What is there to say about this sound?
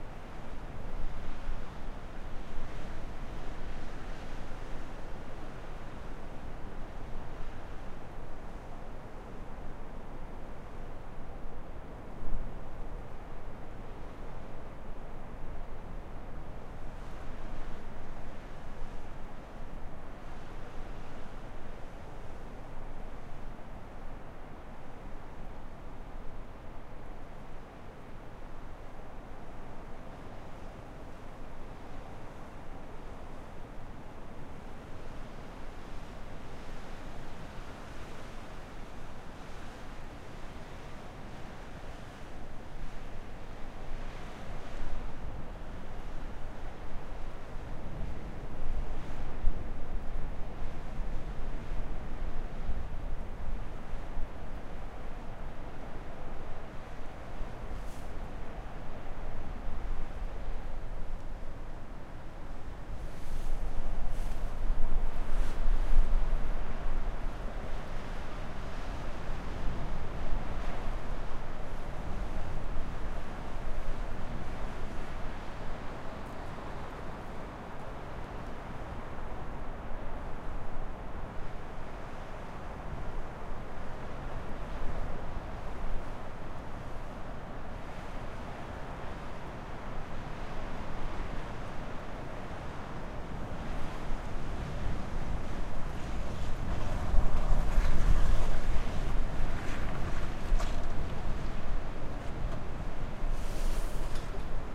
Wind during snow storm #2
Wind during a snow storm at Dove Lake carpark, Cradle Mountain, Tasmania, wind speed 30-50 kph. Recorded 29 May 2019, 4:43 PM, just on dark, on a Marantz PMD661, using a Rode NT4 inside a Rode Blimp, pointing away from the wind from the back of a station wagon. Near the end, a car pulls up about 50m away.
cradle, mountain, snow, wind, winter